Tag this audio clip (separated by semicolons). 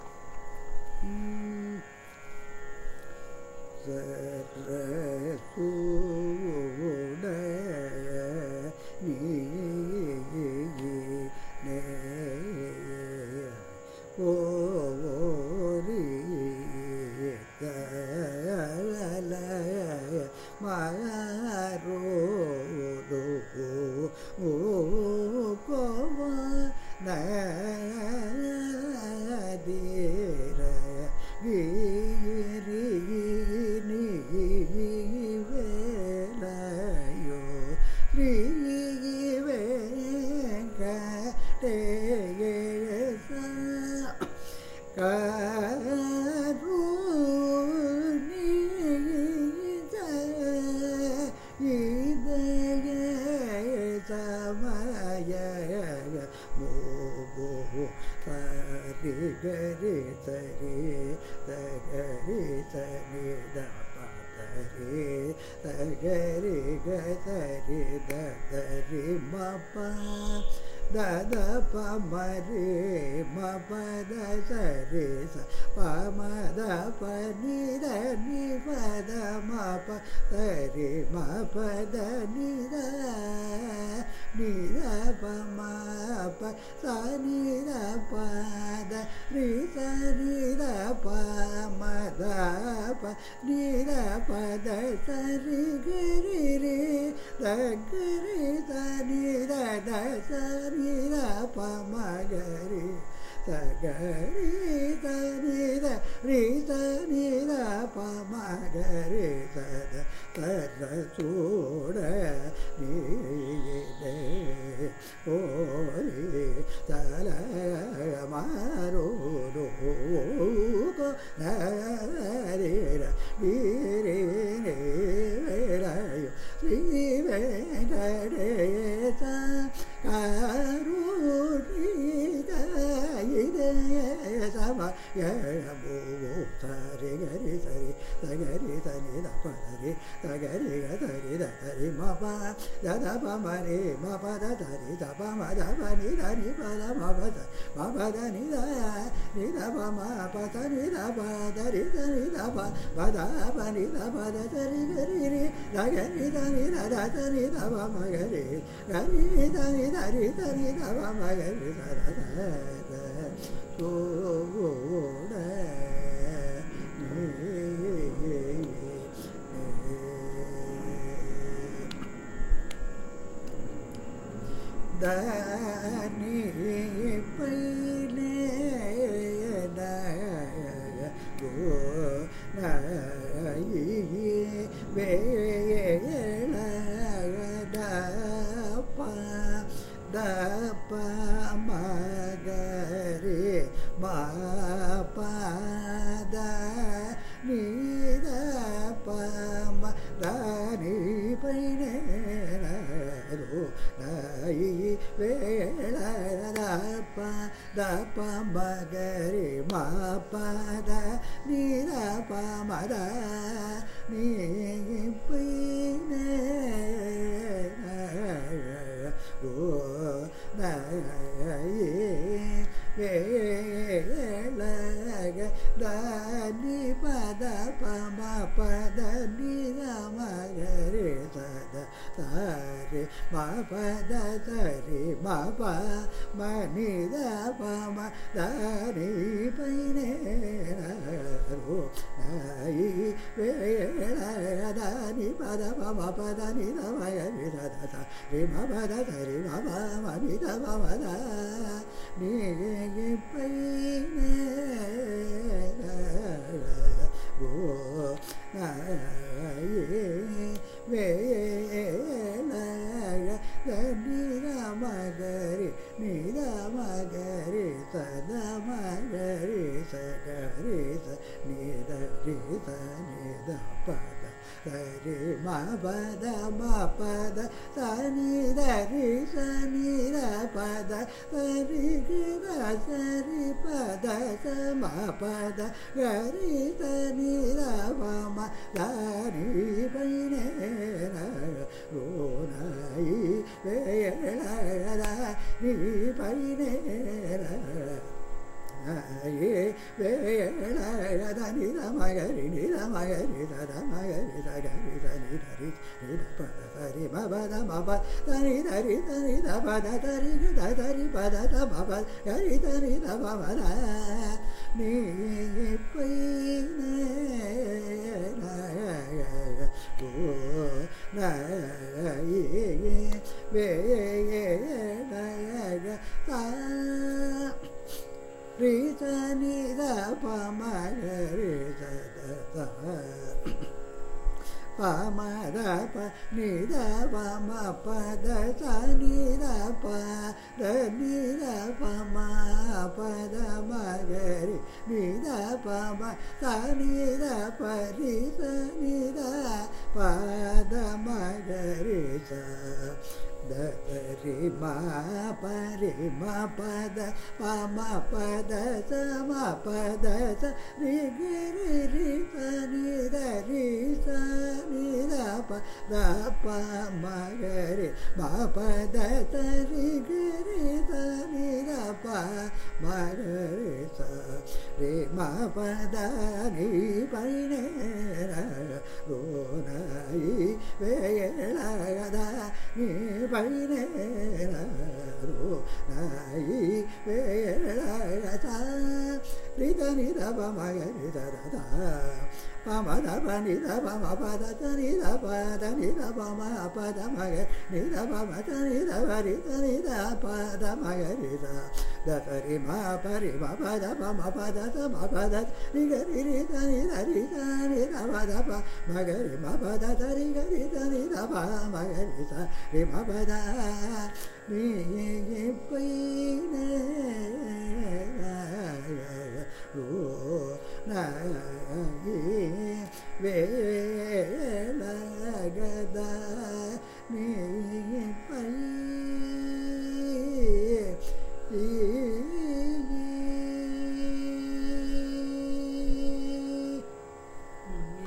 carnatic; carnatic-varnam-dataset; compmusic; iit-madras; music; varnam